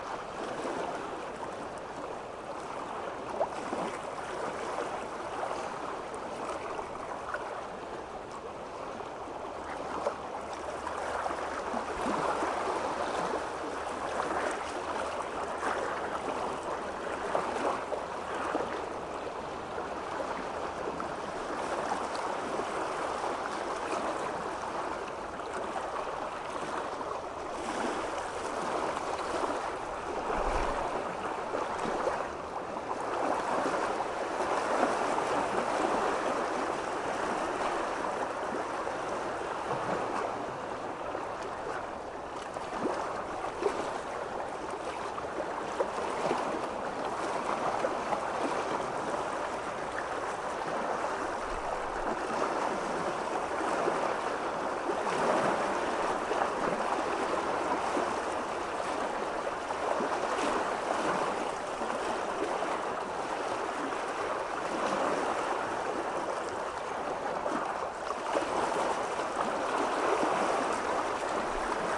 Wave ripple from the Baltic Sea outside Stockholm